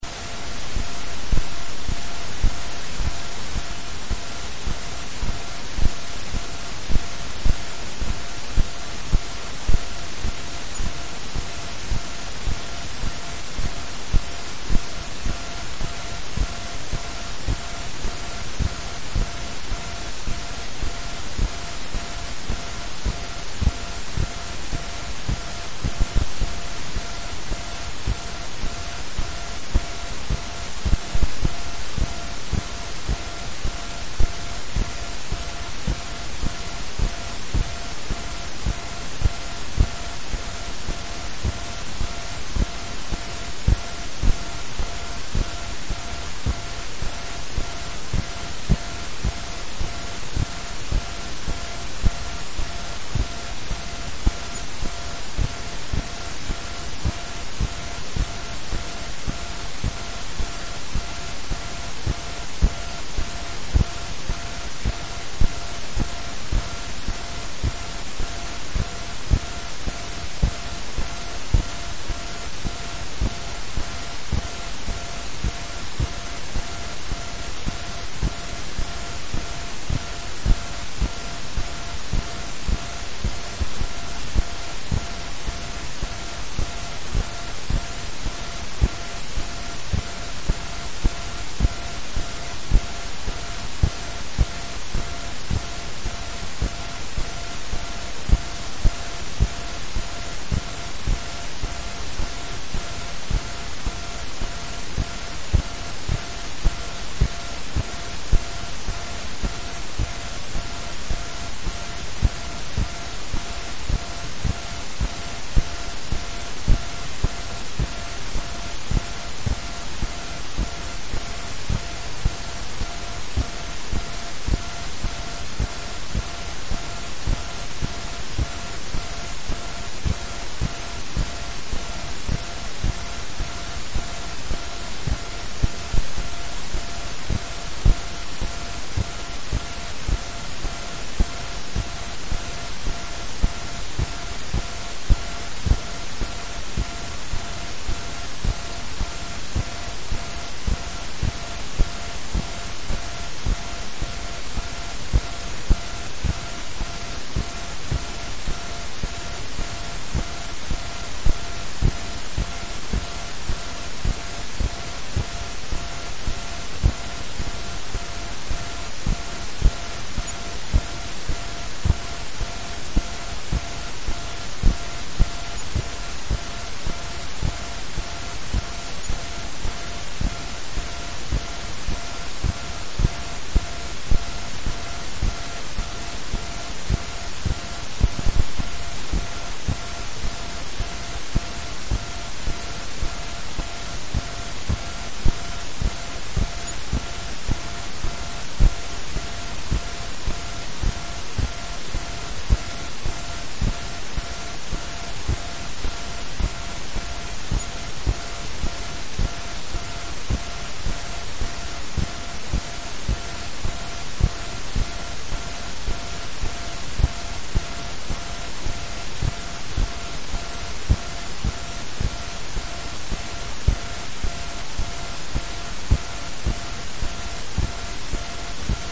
I had a apparently silent recording on my H1 which was probably from having something plugged into the line-in but no sound going through it. I turned it up as loud as I could and this was what was there
noise, lowercase, hum, ground, electrical, ambient